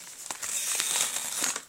Ideal for those humanoid transforming into something else moments, stretching skin/bone/muscle etc!
I recorded this with a HTC Desire mobile phone (video)
monster, horror, muscle, creepy, transform, skin, creature, change, bone, scary, stretch